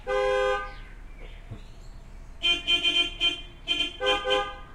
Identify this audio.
Part of a longer recording: two cars using their horns as a farewell of the drivers. Recorded in Esbjerg / Denmark, using a R-09 HR recorder, a Fel Preamp and 2 Shure WL 183 microphones.